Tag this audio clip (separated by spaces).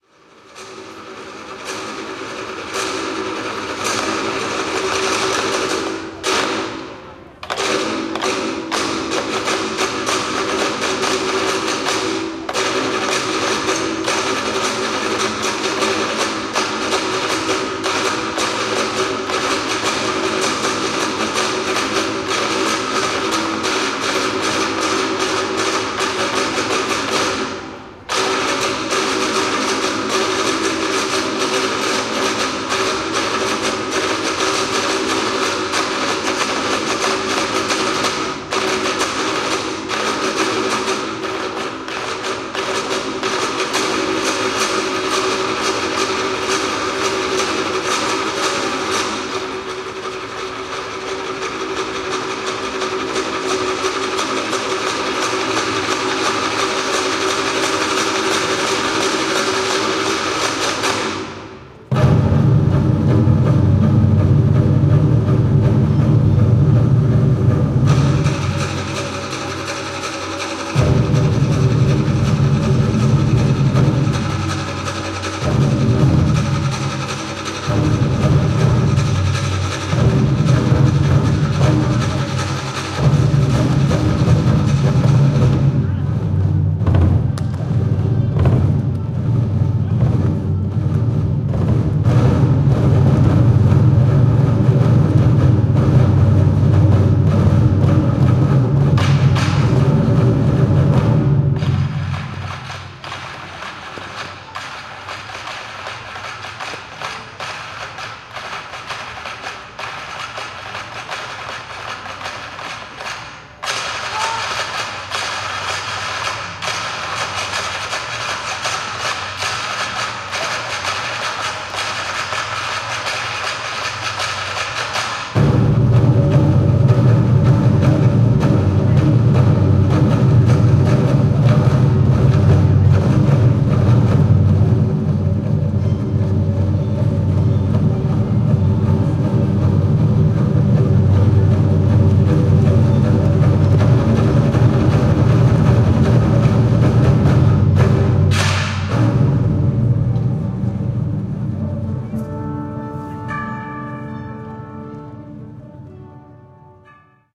muyu sticks biangu Chinese tanggu tluo traditional heterophonic paigu yaogu bofu drums drum Huapengu bayin Xian Dagu field-recording Asia percussion